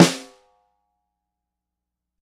Unlayered Snare hits. Tama Silverstar birch snare drum recorded with a single sm-57. Various Microphone angles and damping amounts.
Snare, drum, unlayered, shot, sm-57